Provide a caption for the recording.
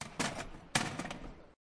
Sound of a chair which position is being changed.
Taken with a Zoom H recorder, near chair legs.
Taken in the UPF Poblenou plaza.